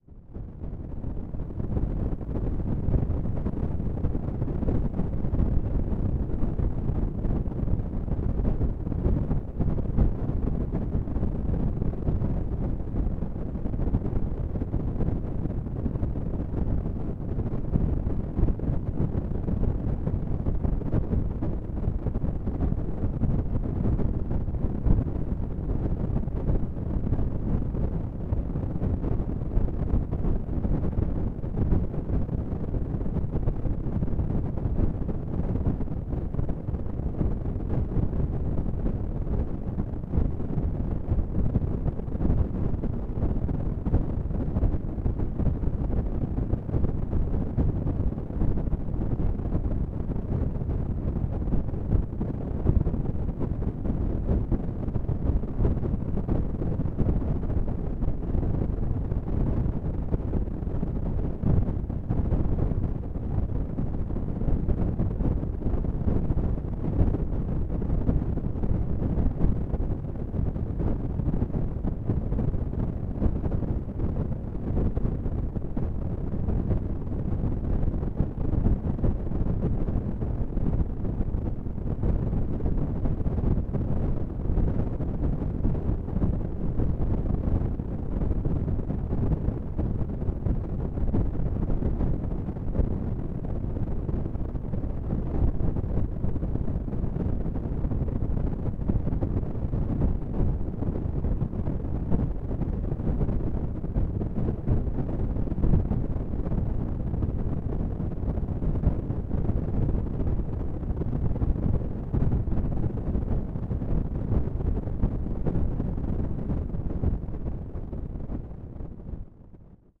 1.This sample is part of the "Noise Garden" sample pack. 2 minutes of pure ambient droning noisescape. Low frequency windy noise.